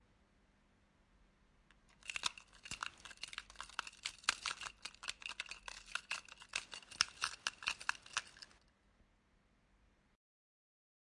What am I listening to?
A child playing with keys.